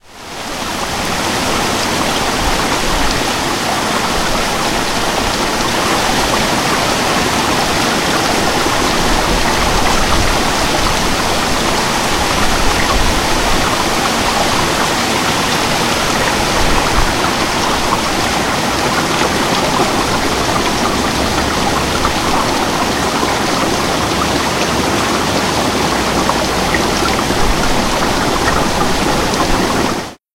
Midnight summer rain
Raining steadily and quite hard at midnight after a hot day. Recorded from a third floor window facing the street, some grass and trees. Plenty of water on the ground.
rain, weather